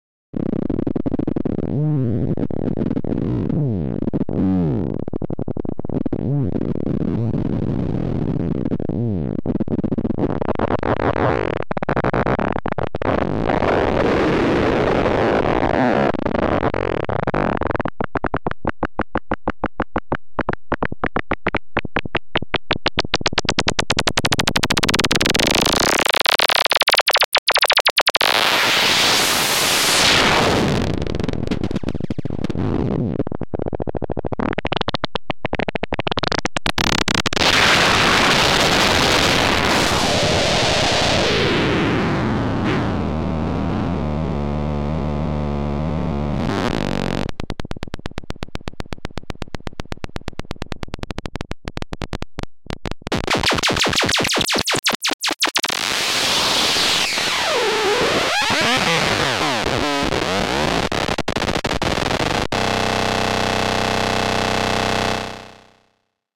One in a series of long strange sounds and sequences while turning knobs and pushing buttons on a Synthi A.
glitch, sound-design, synthi, weird